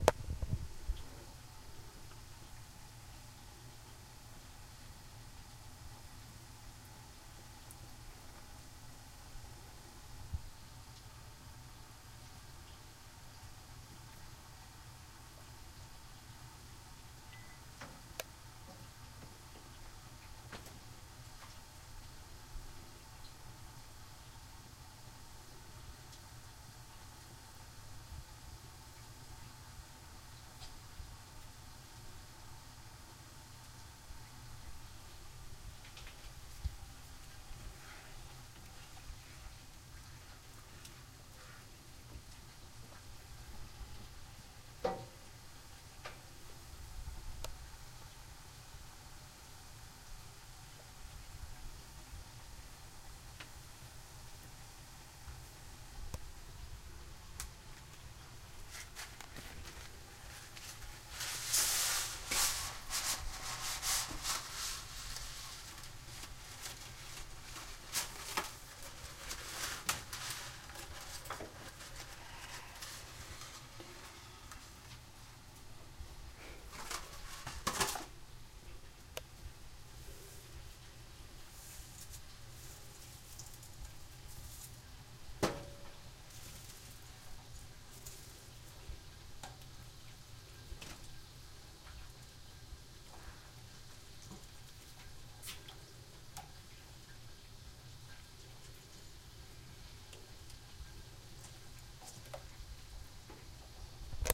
bowling water for noodles and sauce